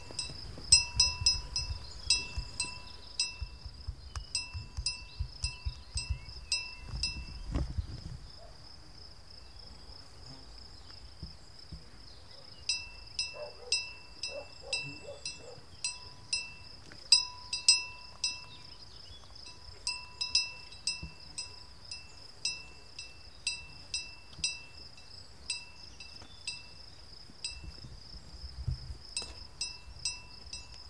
Sound of the cow's bell in the Galician mountains
Cows moving through the countryside shaking and ringing the bells they wear around their necks.
bell, bells, campana, campanilla, cow, ring, vaca, vacas